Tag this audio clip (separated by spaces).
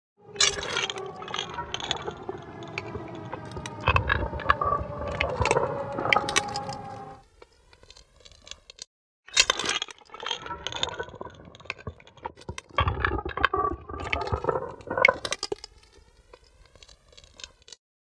congelacion cool-breeze efecto effect freezing sound-design